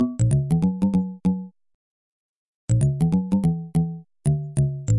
Keys The Quid of the Question - 2 bar - 96 BPM (swing)
A sequence I made for a beat at the end of the XX century, if my memory serves me correctly.
Made with FruityLoops.
curious, fruityloops, funny, hip-hop, keys, strange, swing, weird